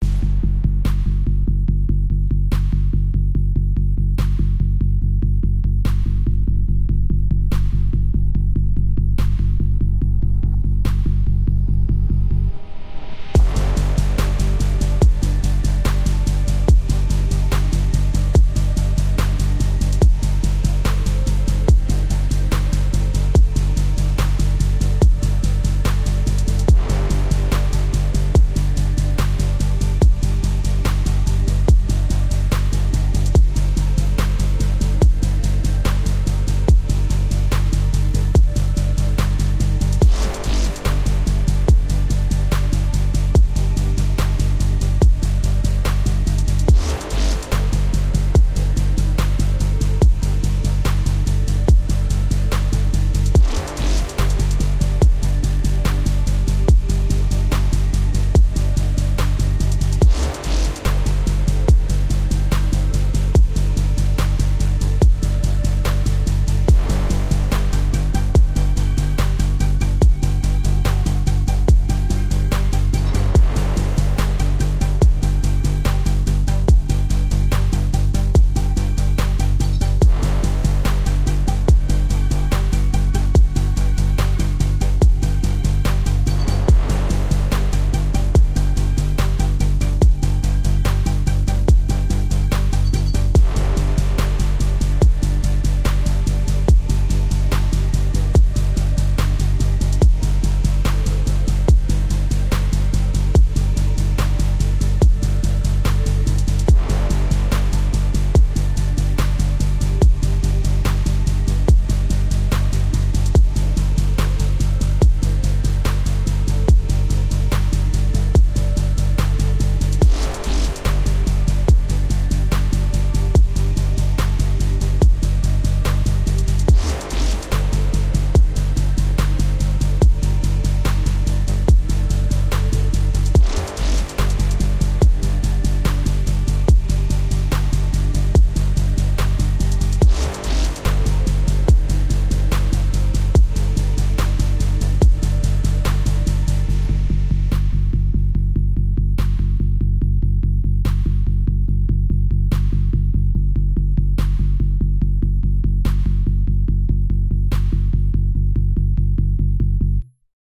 Action Background Music
Track: 46
Genre: Action
Trying new composition
background, bass, brass, dubstep, loop, music, rhythm, trance, trap